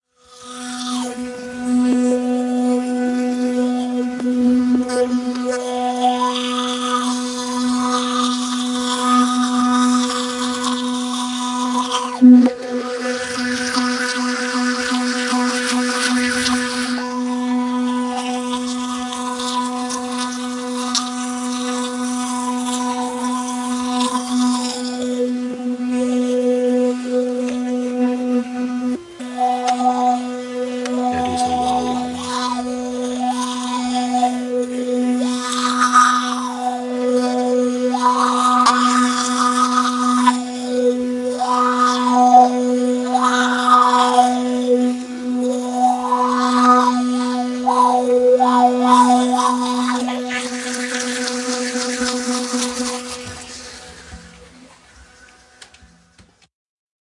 my girlfriend brushing her teeth with an electric tooth brush. The fundamental pitch of the toothbrush motor is just near B2. The mouth shape adds the first few upper harmonics (a la throat singing). make a pad or pull into a granular synth!
recorded with an AKG C214 into an NI audio kontrol 1